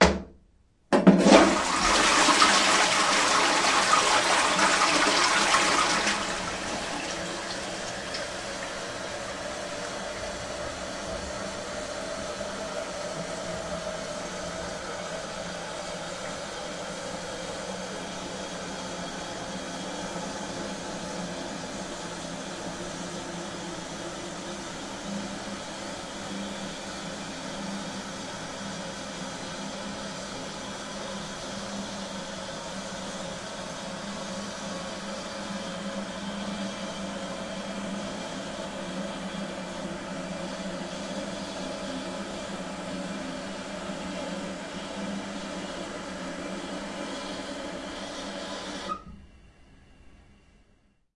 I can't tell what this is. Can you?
Flushing the toilet and waiting for it to refill.
Recorded with Zoom H2. Edited with Audacity.
wc, flushing, flush, refill, water, toilet